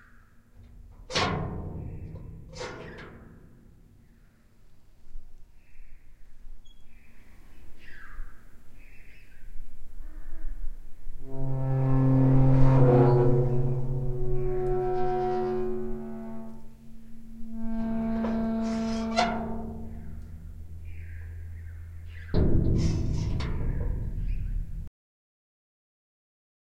Old door that rubs.Recorded with a Zoom H1
close, door, handled